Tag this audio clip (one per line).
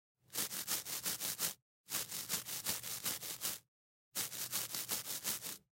brush
cleaning
toothbrush
cepillo
brushing
brushes